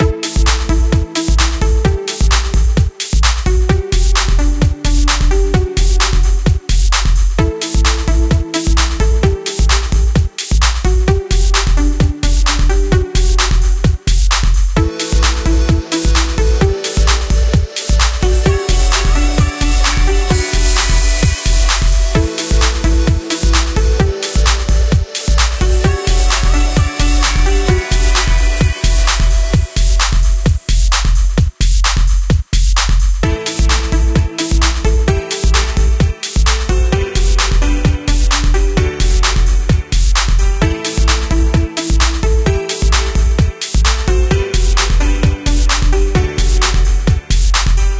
bubbs the squirrel loop
background, beat, loop, synthetic